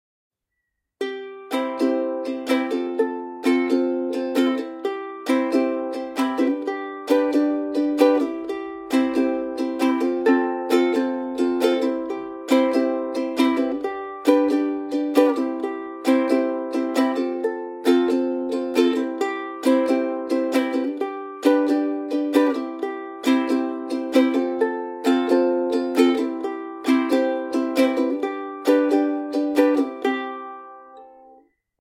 Chords: c, f, c, g
Calypso, chords, Strum, Ukulele